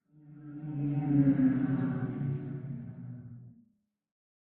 CR SharktopusRoar2Depths
Sharktopus roar, medium perspective.
monster, octopus, roar, shark, water